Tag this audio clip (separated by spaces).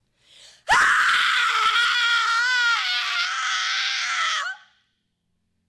agony cry der-schrei exaggerated exaggerating female helmut horror human pain schreeuw schrei scream screaming screams shout shouting turn-down-the-volume-when-you-play-this vocal voice woman yell yelling